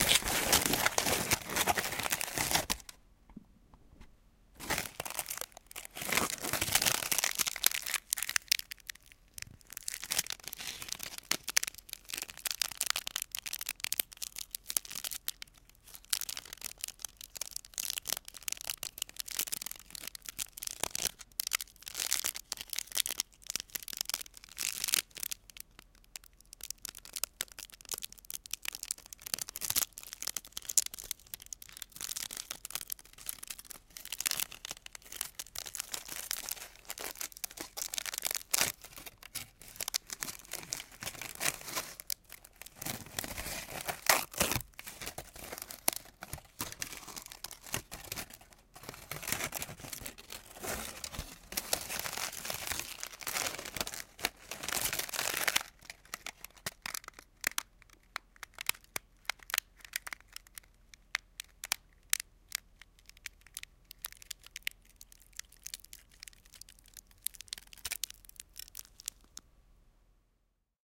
crumpling silver foil
Recording of crumpling a silver foil, already crumpled a few times, therefore a little bit exhausted. Recording done using a Sony PCM-D50